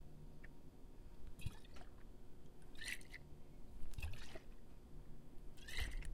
Bottle Pour
This is the sound of a bottle being poured from a short distance into a mug. This was recorded in a small room with lots of items and concrete walls. It was recorded on a tascam DR-40.